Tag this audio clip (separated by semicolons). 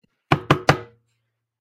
3; Door; Knock; Knocking; Times; Wood; Wooden